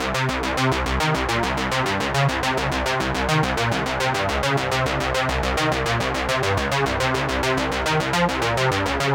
Just a bassline i was playin around with.
105-bpm, bass, bassline, beat, distorted, distortion, hard, melody, pad, phase, progression, sequence, strings, synth, techno, trance